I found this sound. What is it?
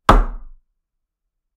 Wood Impact 2
This is the sound of a piece of a wooden table hitting a different wooden table.
I originally tried to hit the table with itself but I failed and learned a harsh lesson on object permanence in the process.
development, field-recording, game, games, gaming, hit, impact, wood, wooden